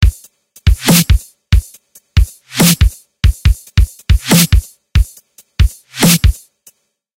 Dubstep Drum Loop(140bpm)
140, 140bpm, dub-step, dubstep